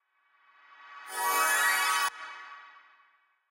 Heal Short
holy, healer, spell, priest, heal, skill